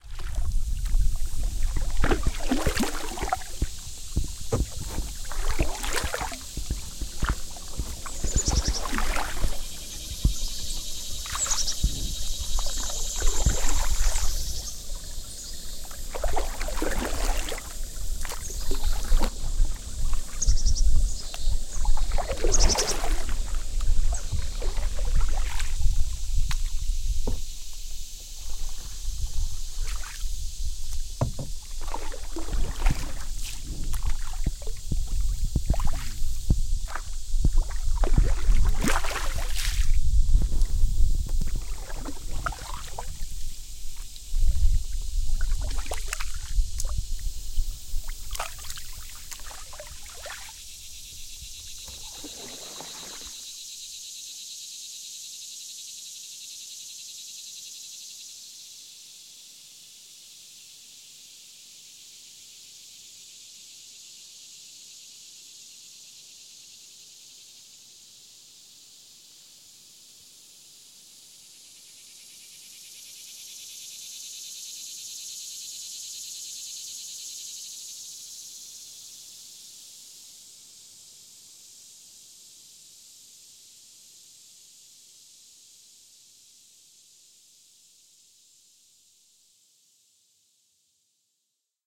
A soundscape of a canoeist, with birds and the typical later Summer insects
swelling. Recording made near a small Mid-western pond with my Zoom H4-N recorder and its built-in microphones.